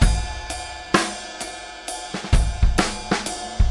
trip hop-04
trip hop acoustic drum loop